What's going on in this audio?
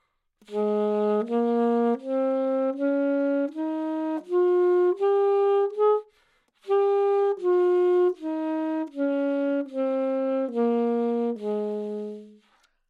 Sax Alto - G# Major
Part of the Good-sounds dataset of monophonic instrumental sounds.
instrument::sax_alto
note::G#
good-sounds-id::6815
mode::major
sax, alto, GsharpMajor, neumann-U87